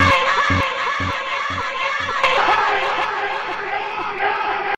A clip from a longer recording made in the practising room of the band I am playing in located at the former base of the U.S military in Iceland.Two tracks running with voices origianally saying FASISTAR in Icelandic. Reverse, delay and volume gain added in Cubsase.Enjoy and embrace !
action, spacesound, shout, screams, alien, vocals